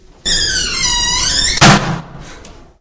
I recorded a creaking and slamming door. I cut the sound to keep only what I was interested in. I amplified the high pitched sounds of the creaking, I amplified the sound and autorised saturation for the slamming sound to emphasize it and make it more violent. I kept the sound of a person sighing to make think that this person is jumping with surprise when hearing the slamming door.
Typologie : V puis X’
Morphologie : masse : Son continu varié, puis deux impulsions complexes
Timbre harmonique : Le son est acide pendant le grincement, puis sec pendant le claquement
Grain = Le son est plutôt lisse au départ puis rugueux sur la fin
Dynamique : L’attaque est plutôt soudaine, abrupte.
Profil mélodique : Variation Scalaire